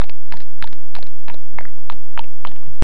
/////description de base ////////
A galloping horse
//////////Typologie (P. Schaeffer)///
X'' : itération complexe
////////Morphologie////////////
- Masse:
Groupes de sons / Nodal
- Timbre harmonique:
Terne
- Grain:
présence de grain
- Allure:
vibrato dans l'allure
- dynamique:
Attaque graduelle. Le son est de plus en plus fort
- Profil mélodique:
variation en escalier
- Profil de masse:
filtrage des aigus